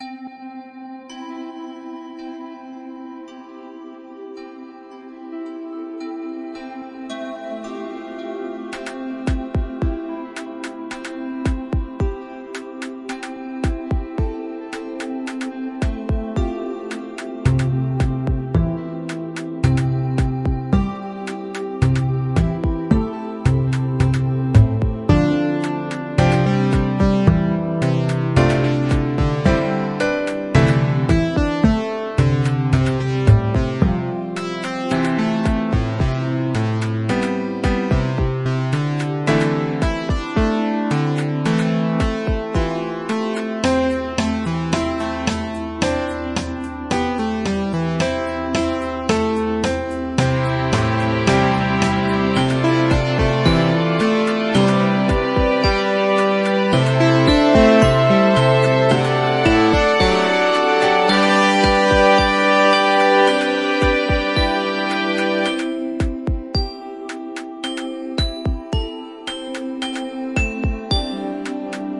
Memorable Journey Loop
A music loop that I took some time to make, it might be good for backgrounding cinematic videos
I am very inexperienced at this, I guess you could say this is the first complete loop I've made
comfortable, Emotional, loop, memories, music, quantized, relaxed, rhythmic